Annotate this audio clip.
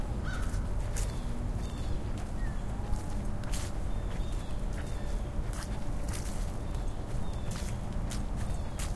dragging feet walking on leaves 1-2
Recorded closer to dusk, this is me walking with a Roland Edirol in my pocket. The internal microphone was being used and he high-gain implemented. As I walk the chain on my wallet becomes audible, following the rhythm of my footsteps.
feet, leaves, dragging, crunch, while